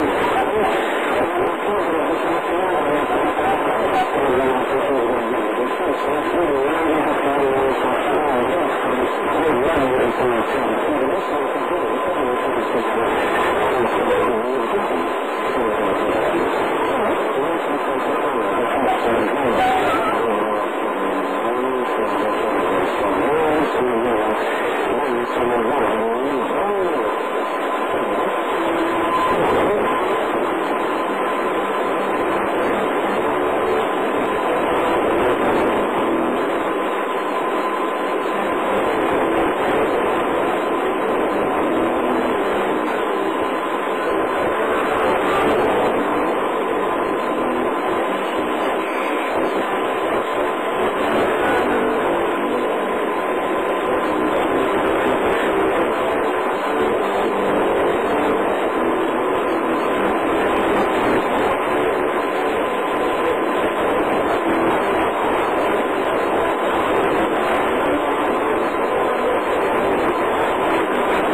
allmost there
Staying just outside of a station's frequency picking up a lot of different frequencies and some morse codes recorded on the AM band.